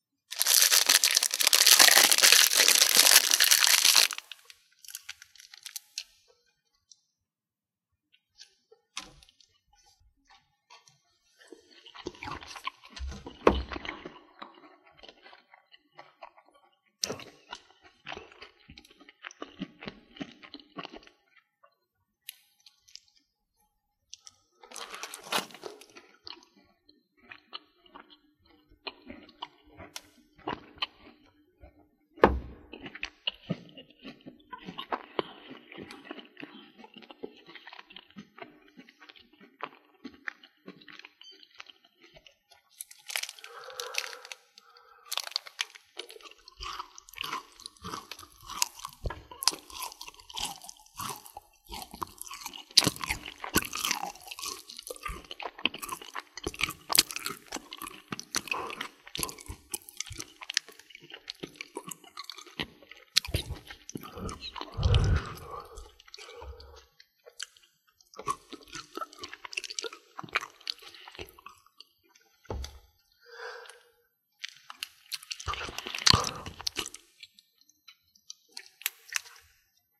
Candy Bar Mastication
Candy unwrapped and eating with mouth closed and open
Wrapper, Masticate, MUS, SAC, swallow, GARCIA, Mastication, food, Candy, Chew, Chewing